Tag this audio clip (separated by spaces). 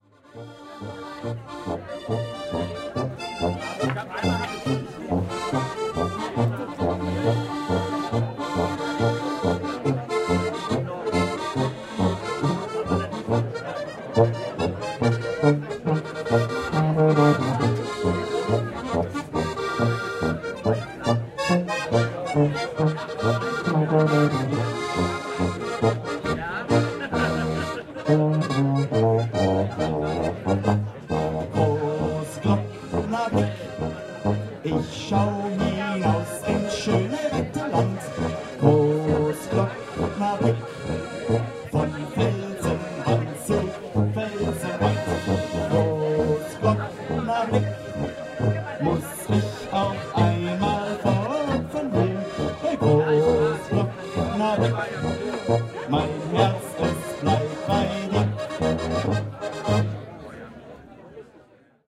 alpine alps austria bavaria field-recording folk-music openair switzerland